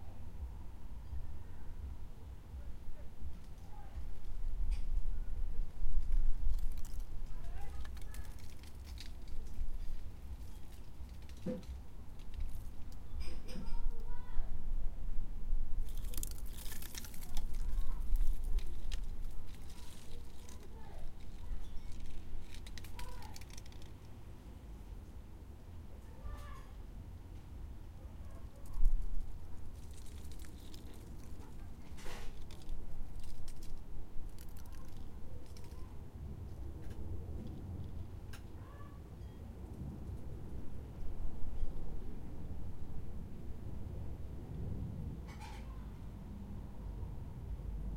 Dry leaves and parasol creaks 14 and setting the table
Summer, late afternoon. The wind plays with some very dry leaves.
The wodden garden parasol creaks and squeaks slightly in the gentle breeze.
Setting the table outside to have dinner in the garden.
Recorded on 21-Jul-2016 with a Zoom H1 (built in mics).